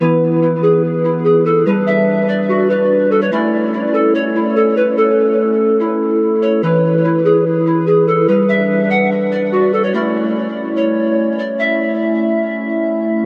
The Fall of Icarus 2
beat, synth, techno, pad, trance, 145-bpm, phase, progression, bass, strings, sequence, melody